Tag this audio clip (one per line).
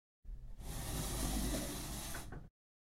madera
arrastre
silla